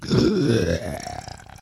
Zombie gargles 3
Zombie gargling sound
creepy, gargle, ghoul, hiss, moan, moaning, monster, snarl, undead, zombie